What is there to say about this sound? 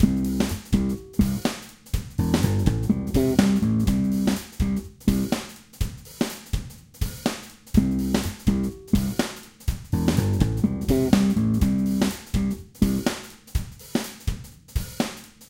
bass groove1
bass groove played by me, drums played by machine. Have fun ;-)
funk, bass